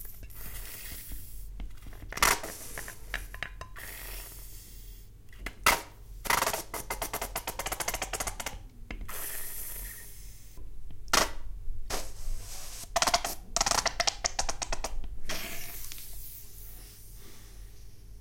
The oozing sound of a squeezed ketchup bottle